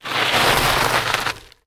Grabbing a big heap of gravel.
Mix and minimal cleanup of: